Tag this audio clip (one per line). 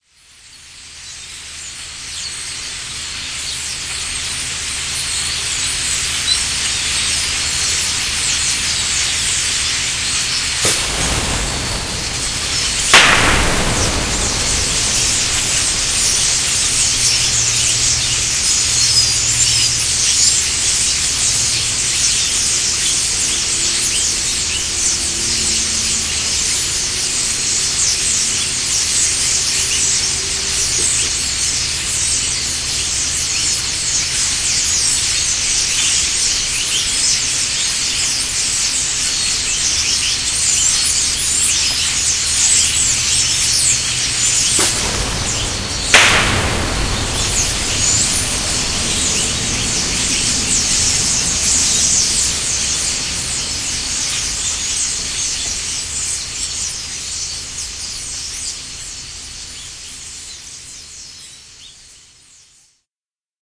birds
swarm
field-recording
rocket
bottle
starling
flocking